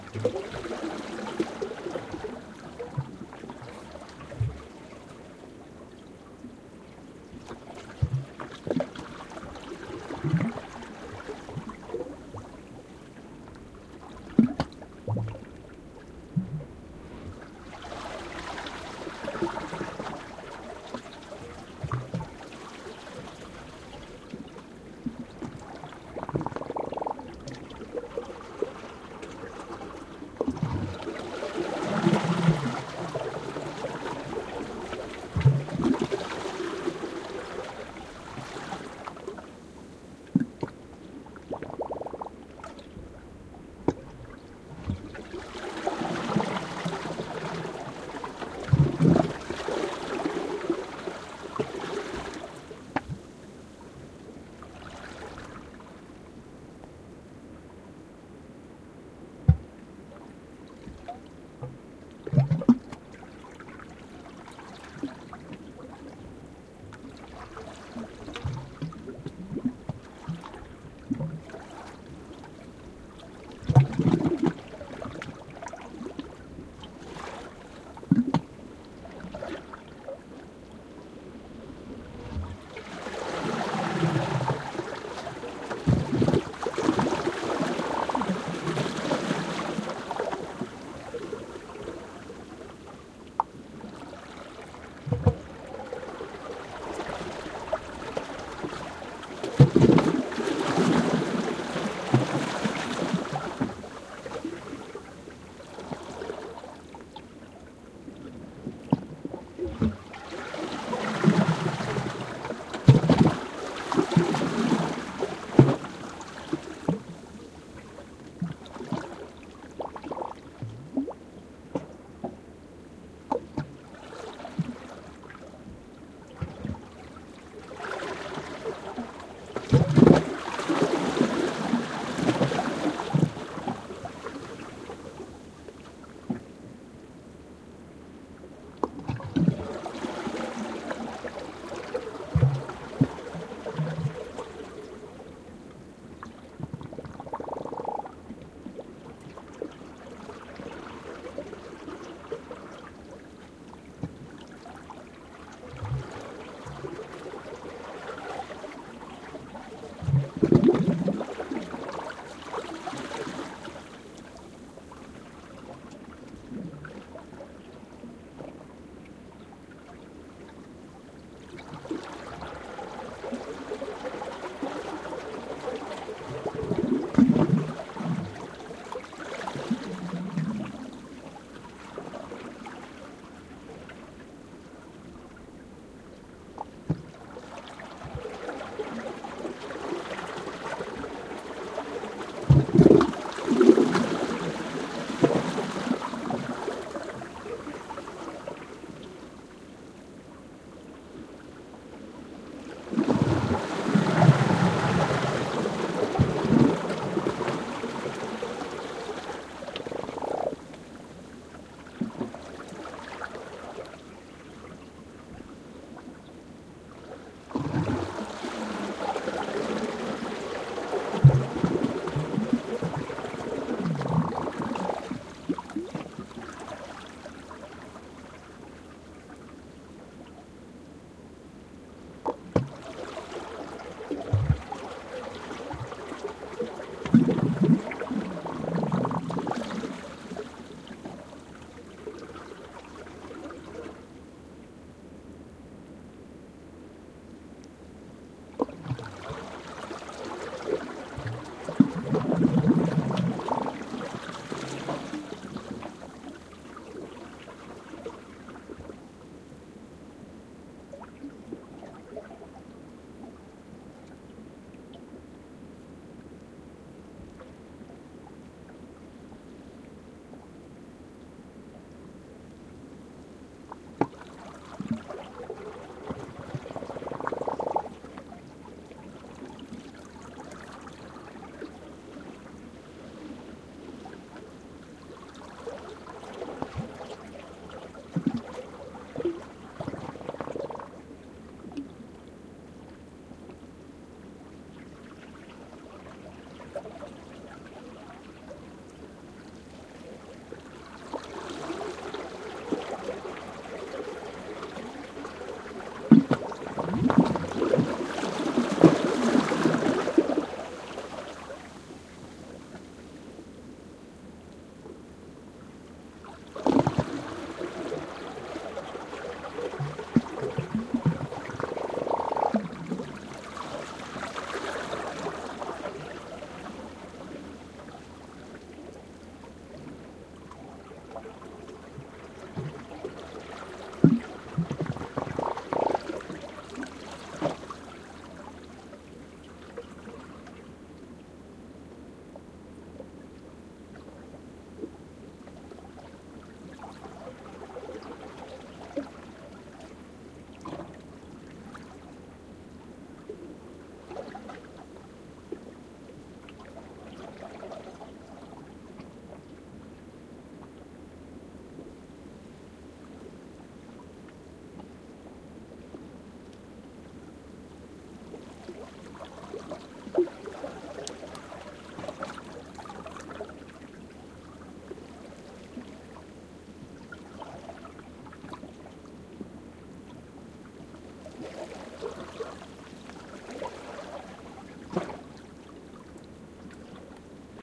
bay of fundy 02
Quiet waves lapping again some rocks on the Nova Scotia Side of the Bay of Fundy. This one is particularly gurgly. Any static type of noises are coming from the water moving through the seaweed near the microphones. Recorded with AT4021 mics into a modified Marantz PMD 661.